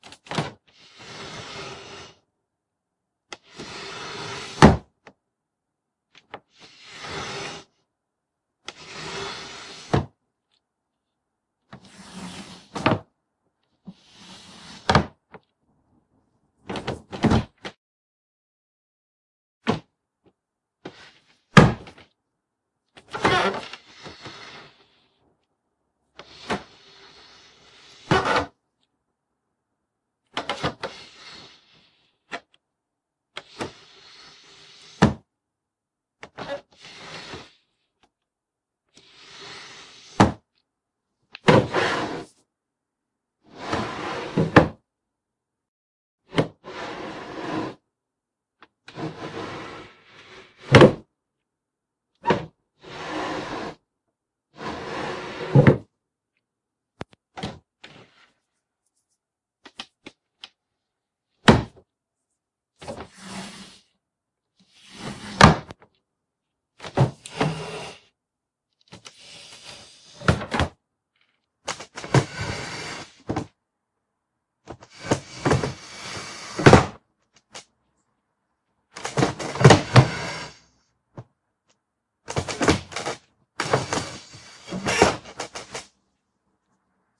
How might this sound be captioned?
Creaky Wooden Drawers
Various samples of me opening and closing my old wooden drawers, mostly with clothes inside of them.
Different drawers have different weights of clothes in them, and are in different states of repair, so if the first sounds aren't quite what you're listening for, take a listen to the later sounds.
Created for use in SinathorProductions' indie project: Queen's Meadow
Old, Sliding, House, Wood, Close, Shut, Squeak, Open, Creak, Drawer, Home, Slide, Door, Wardrobe, Wooden, Cupboard, Household, Chest